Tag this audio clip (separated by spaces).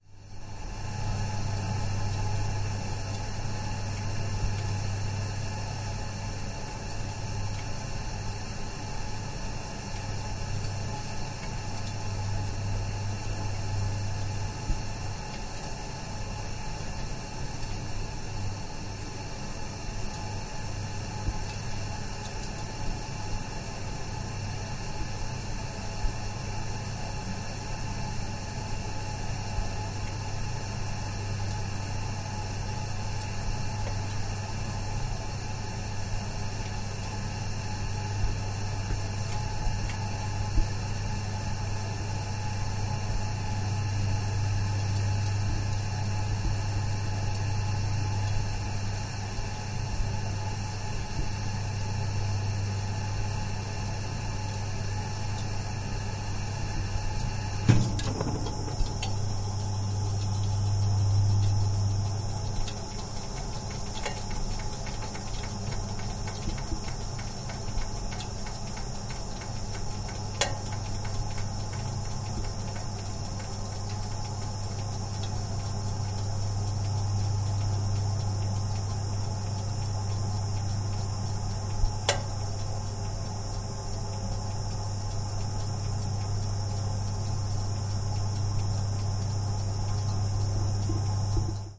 ambient boiler field-recording